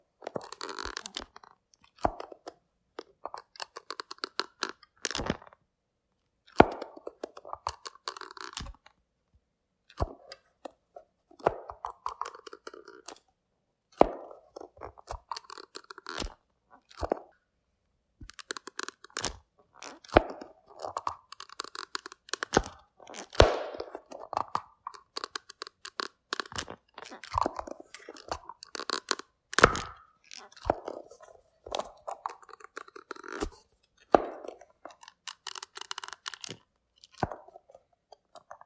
opening closing jewelry ring box eyeglass case
I opened and closed a creaky eyeglass case to approximate a ring box... the actual ring box I found was too quiet & not creaky enough. (I did also uploaded the quieter ring box.) This one is bold & loud!